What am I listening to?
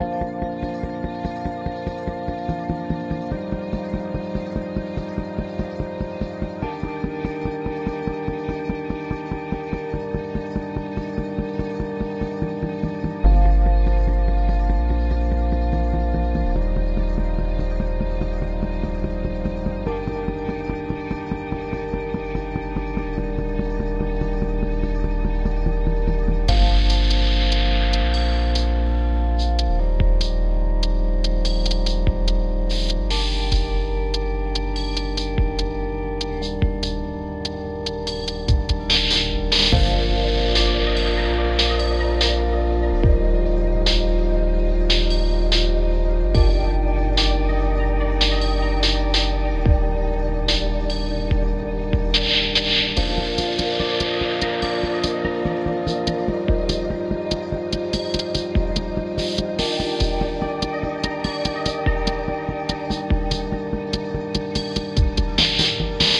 Short loop of an ambient track.
music, electro, ambient, loop, electronic, sample, atmosphere